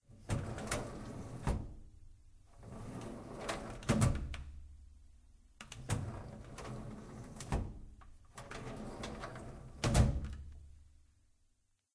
Opening and closing office drawer